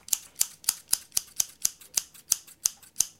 Scissors Cutting Air
I cut some air for you with a pair of scissors. Recorded with Edirol R-1 & Sennheiser ME66.